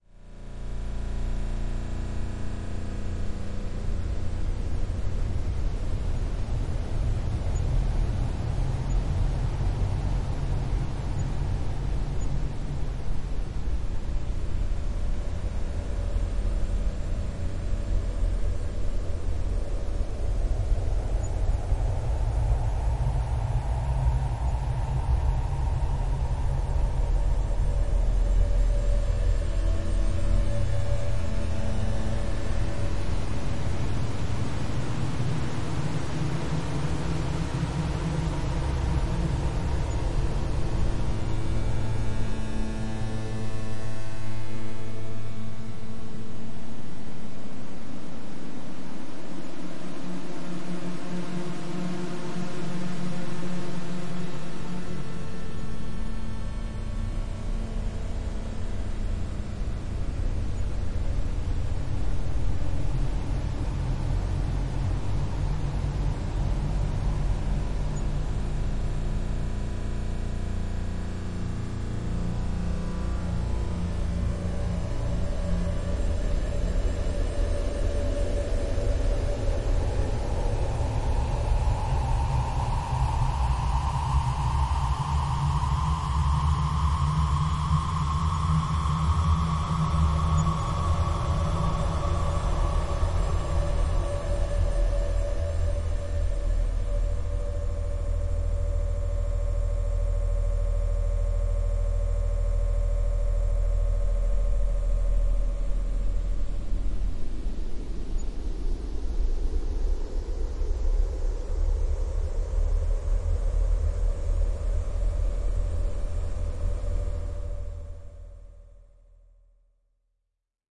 techno fx pad texture plants rumble noise dark atmospheres ambiences Drum and Bass